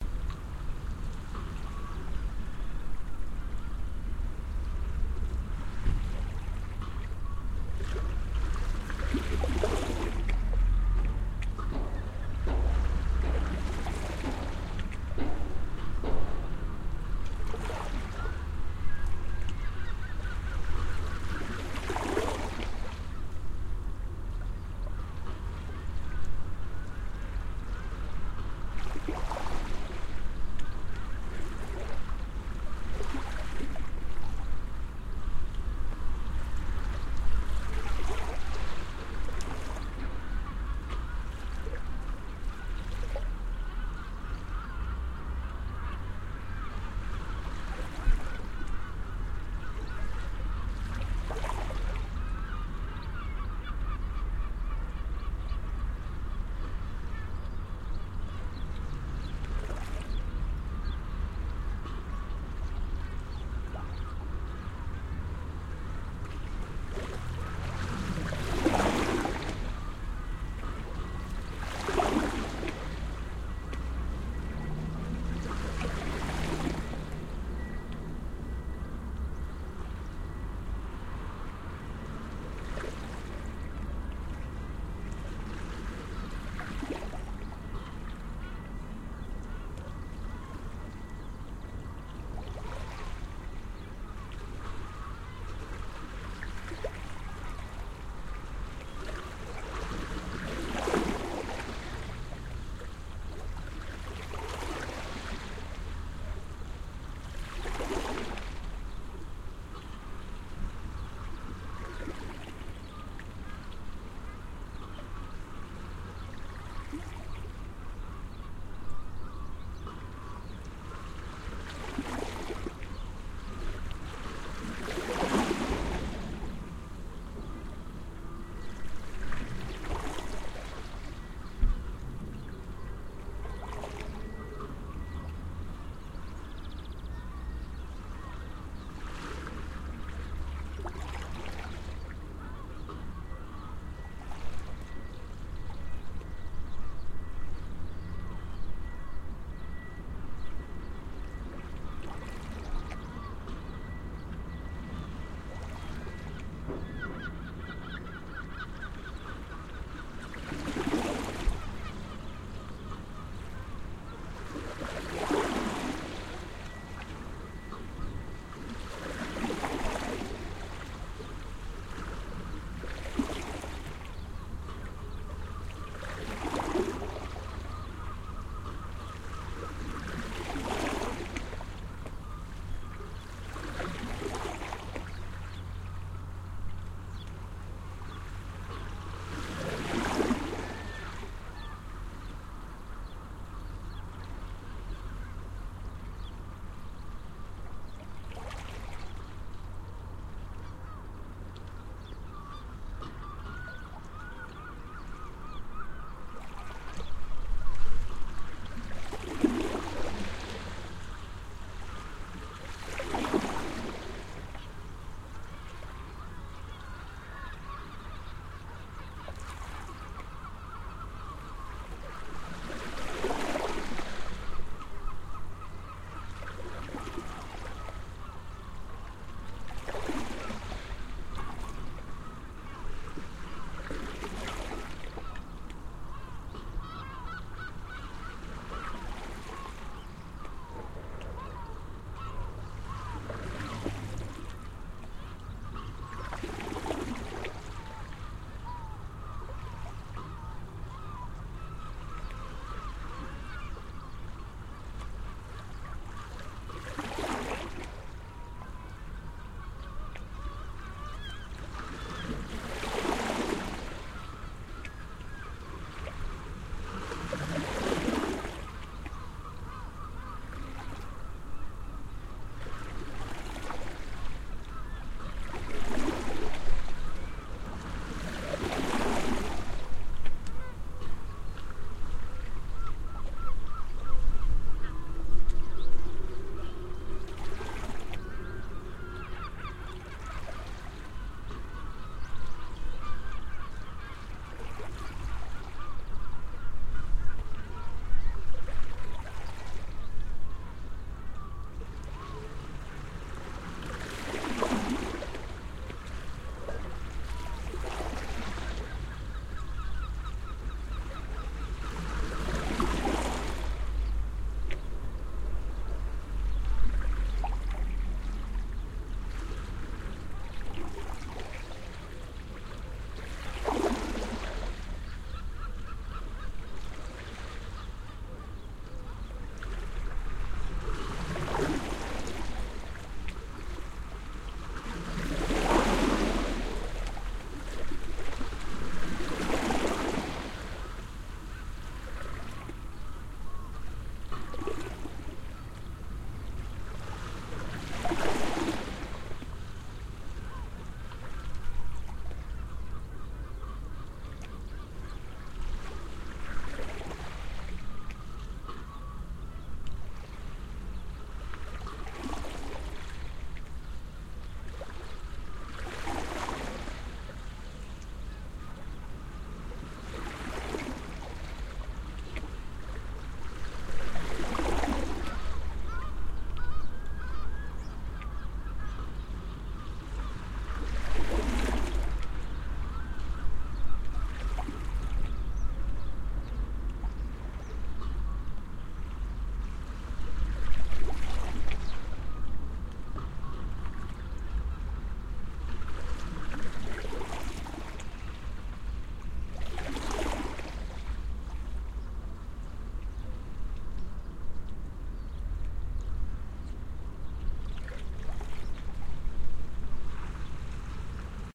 SophieMarittRiver Yare at Gorelston
Waves lapping at Gorelston on Sea. Seagulls and traffic in the distance. Port and traffic noise.
seagulls, Yare, River, traffic, Gorelston, lapping, port, seaside, water, waves